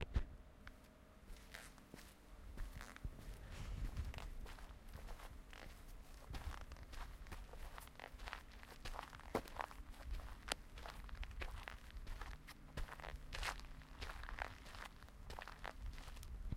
walk sound
footstep, steps, walk, walking, feet, footsteps, step